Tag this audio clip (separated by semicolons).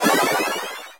bright; dark; fantasy; game; mage; magic; necromancer; shaman; sorcerer